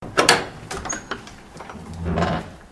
Third raw audio of opening a wooden church door with a metal handle.
An example of how you might credit is by putting this in the description/credits: